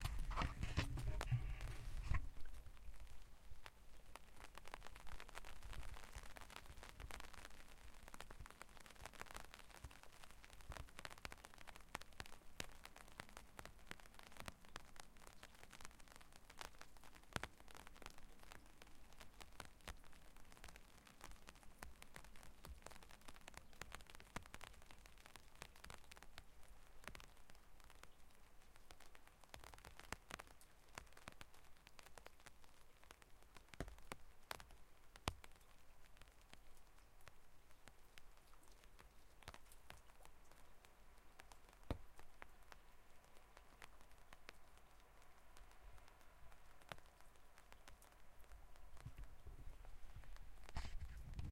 Rain on umbrella
Rain on the canvas of an umbrella
dripping, drops, rain, raindrops, shower, umbrella